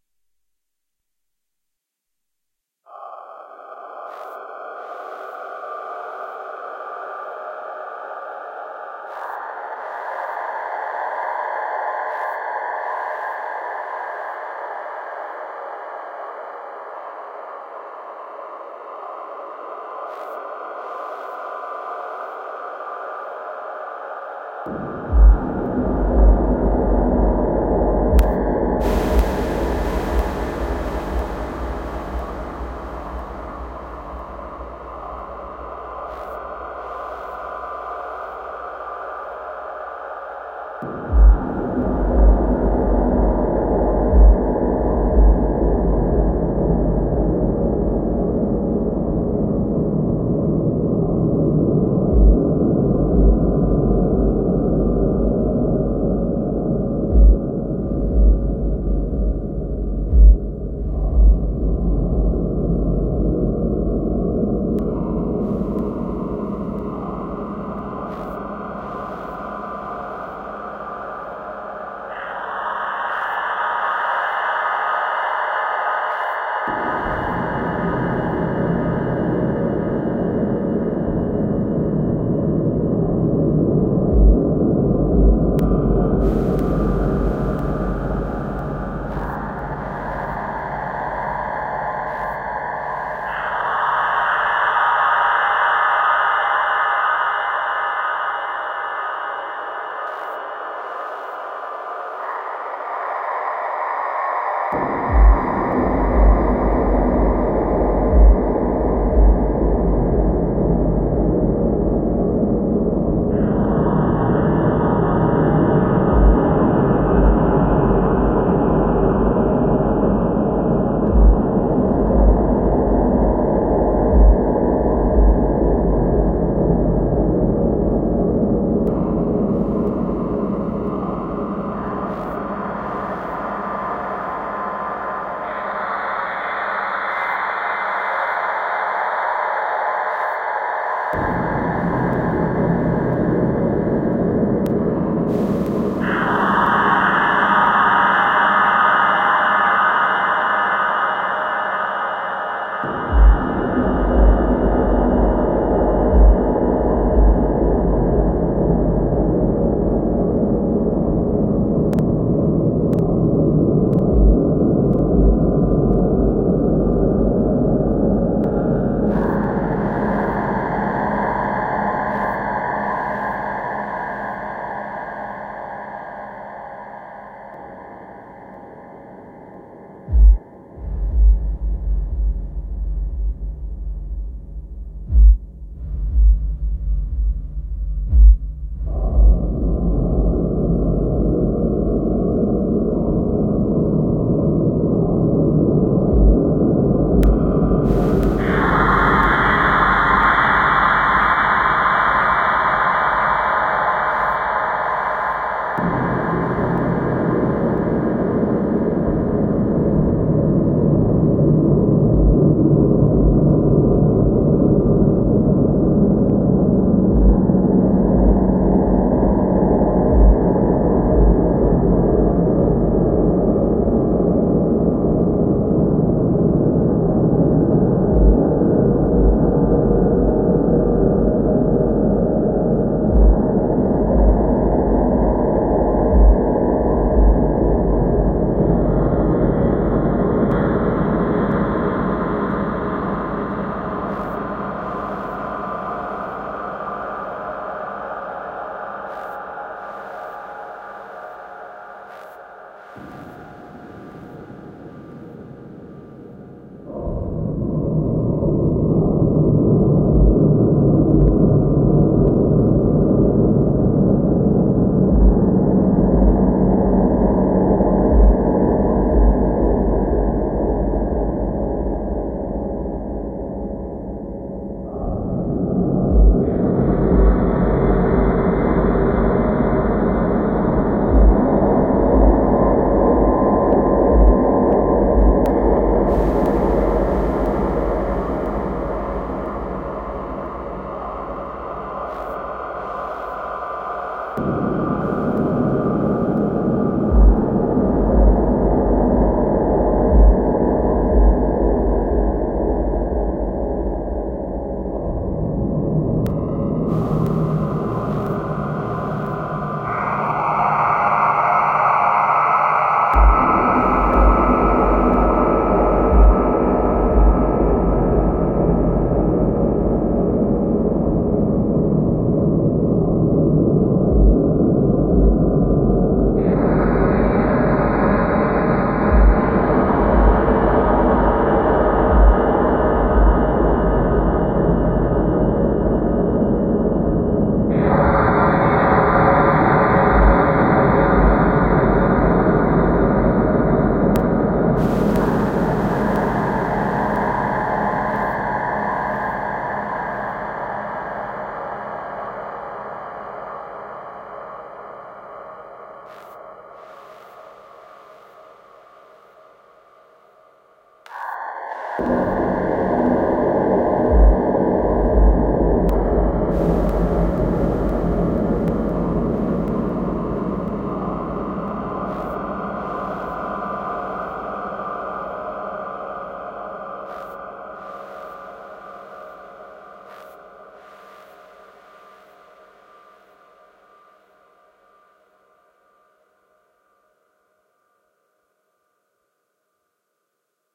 Decrease Detents
A clash cymbal turned down to 10 bpm, repeated on different tones depending on my mood and a triple osc was added
effects: massive delay and reverb, echo and a compressor
odds, deep, glitch, sorrow, bass, cavern, triple, darkness, black, fx, effect, synth, space, echo, cav, cavernous, delay, subwoofer, evil, shape, reverb, osc, sub, dull, ambient